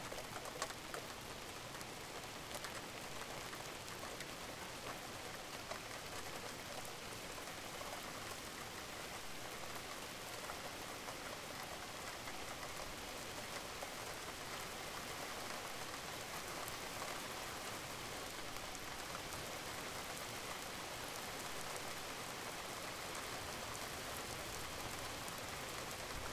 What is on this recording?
Another 30 second sample, this time of a light rain this past August. No thunder or other background noises, just the rain. Perfect to loop.
rain, rainy-day, layering, field-recording, drizzle, background, track, ambience, foley, precipitation